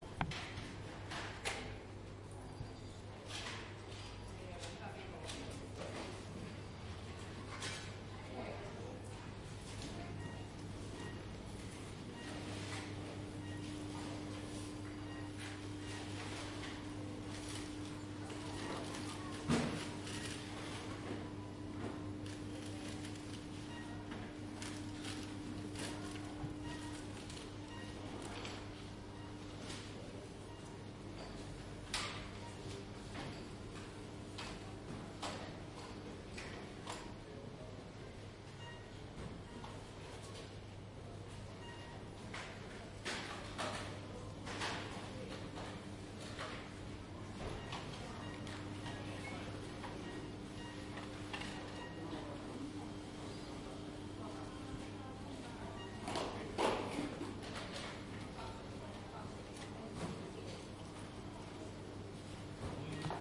shopping mall1
some sounds of shopping mall near cash register.
cash, finland, mall, money, shopping, store